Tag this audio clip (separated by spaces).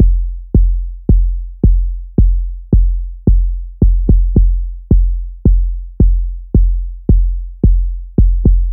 110bpm drumloop kick